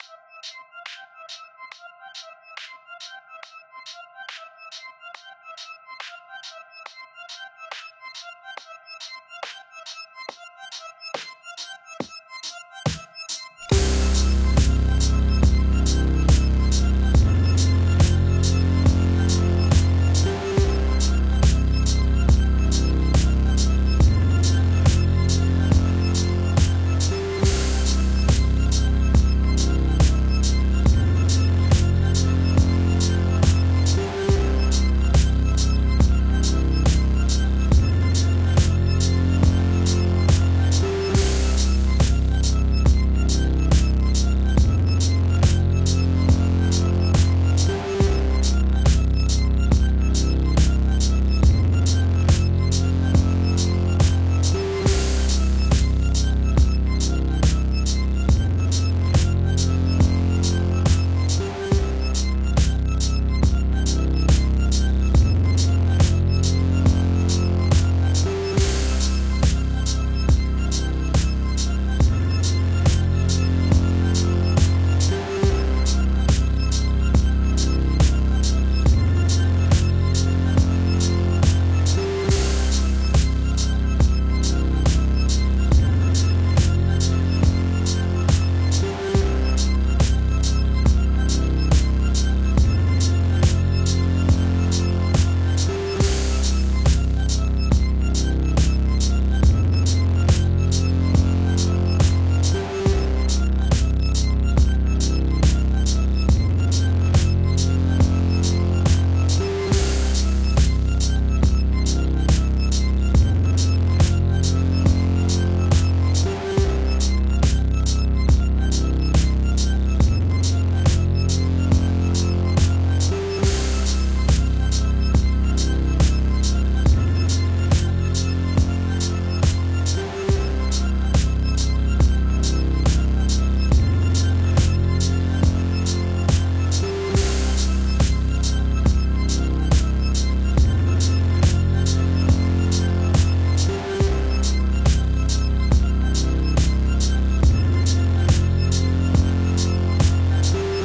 Cool Drum And Synth Loop
groovy, music, synth, percussion-loop, electro, moog, song, rhythmic, drum-loop, ambient, loop, cinematic, free, commercial, synth-loop, film, korg, movie, repetitive, electronic, garbage